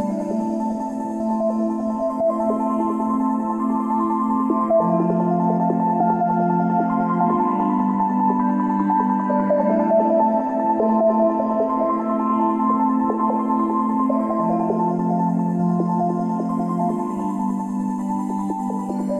100 bpm loopable pad of 8 bars.
Chords: Am Am F G Am Am F G.
100-bpm, loop, pad, melancholic, Am, minor